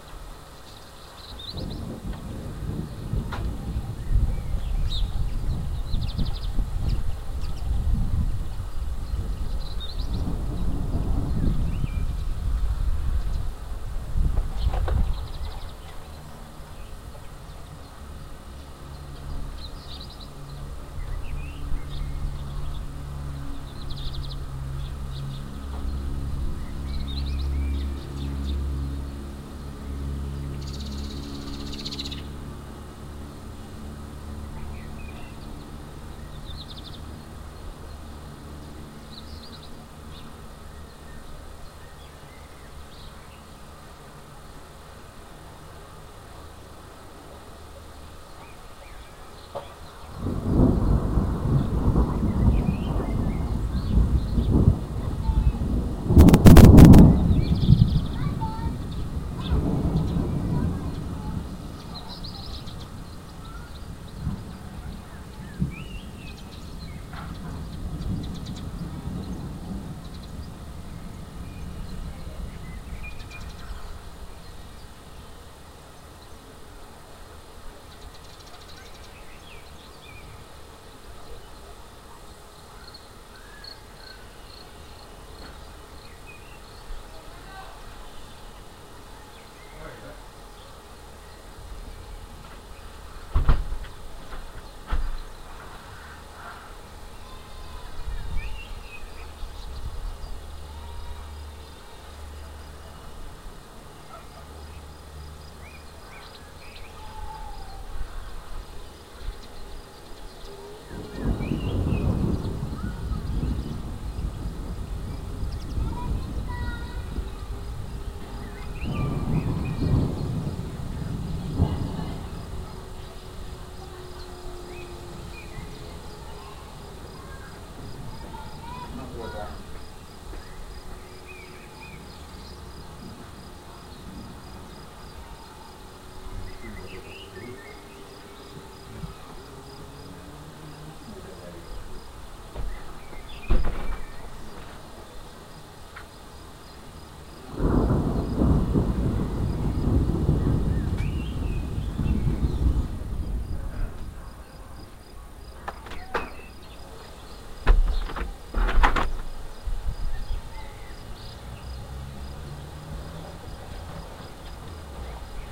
This is the first thunderstorm of the year 2007 in my hometown. I have recorded it by MP3 player.
ambience, field-recording, lightning, rain, rainstorm, storm, thunder, thunderstorm